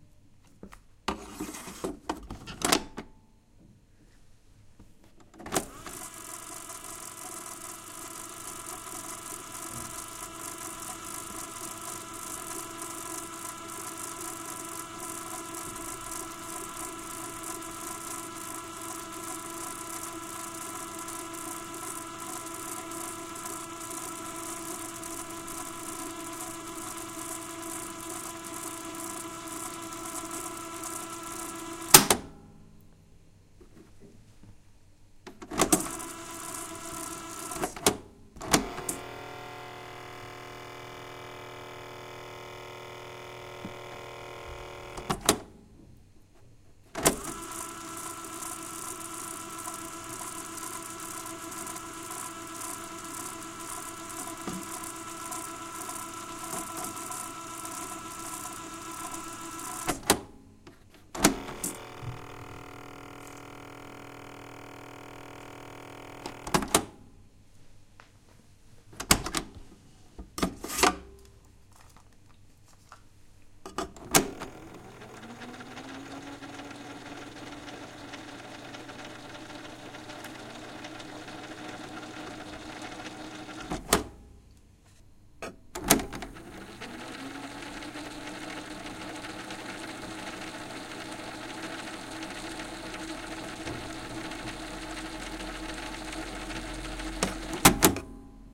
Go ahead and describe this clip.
noises made rewinding a cassette, medium level. Shure WL183 into Fel preamp and Edirtol R09 recorder